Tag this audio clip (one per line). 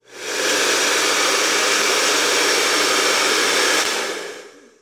Electric
Robot
Machine